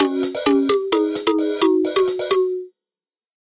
Klasky-Csupoesque Beat (130BPM 11 8) Pattern 010k (with Drums)
Short, single bar loops that use a unique tuning system (that I have described below). The end result of the tuning system, the timbre of the instrument, and the odd time signature (11/8) resulted in a sort of Rugrats-esque vibe. I named the pack based on the creators of Rugrats (Klasky-Csupo). The music has a similar sound, but it's definitely it's own entity.
There are sixteen basic progressions without drums and each particular pattern has subvariants with varying drum patterns.
What was used:
FL Studio 21
VST: Sytrus "Ethnic Hit"
FPC: Jayce Lewis Direct In
Tuning System: Dwarf Scale 11 <3>
Instead, the scale used is actually just-intoned (JI) meaning that simple ratios are used in lieu of using various roots of some interval (in the case of 12 tone temperament, each step is equal to the twelfth root of 2, then you take that number and you multiply that value by the frequency of a given note and it generates the next note above it).